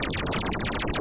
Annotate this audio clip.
Created with coagula from original and manipulated bmp files. This was supposed to be in the loop sample pack but I need to get back to the real world sometime. This takes way too long. Move to my newloop pack please.